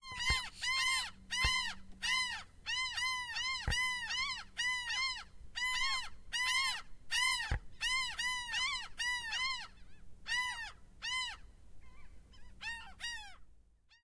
This Foley sample was recorded with a Zoom H4n, edited in Ableton Live 9 and Mastered in Studio One.
mic, microphone, rustle, field-recording, nature, Foley, sound, birds, movement, seagulls, design, dreamlike